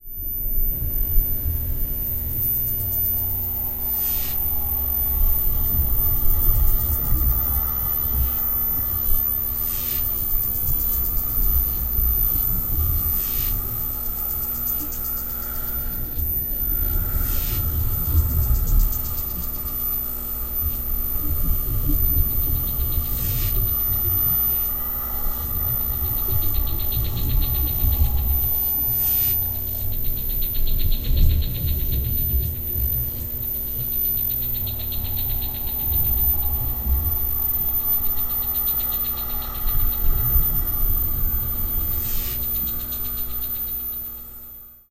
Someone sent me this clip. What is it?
Synthesized industrial background sound.